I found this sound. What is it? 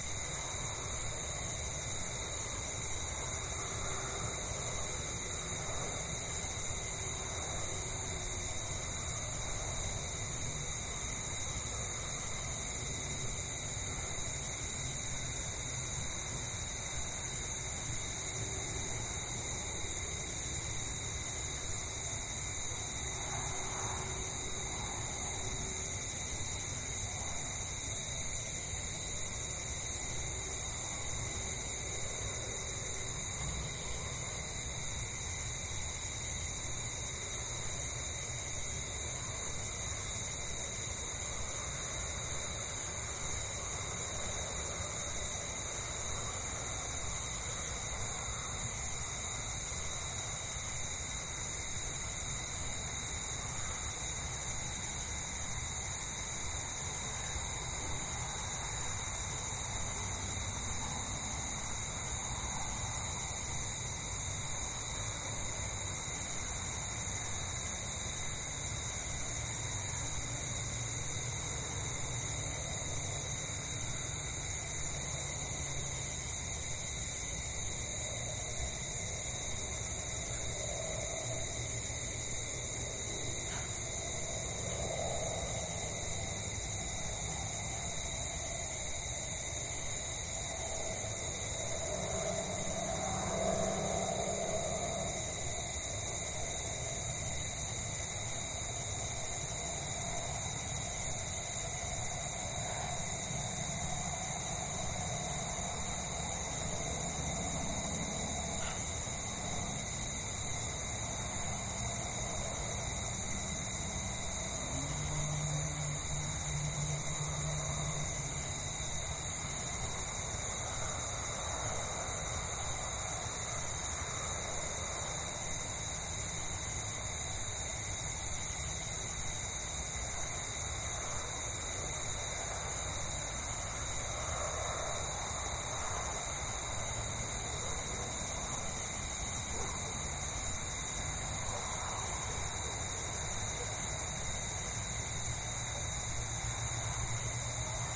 Crickets and Planes - Night Outdoor Ambience
A short recording of some outdoor sounds on my road. You can hear crickets, cars, and planes. It was a pretty noisey night.
background, cars, crickets, field-recording, human, nature, night, outdoors, traffic